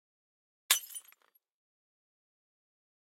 Breaking Glass 07
break, breaking, glass, shards, shatter, smash